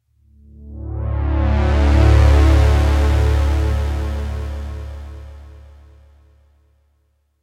C#Aflat-Pulse1
Pulse made on Roland Juno-60 Synthesizer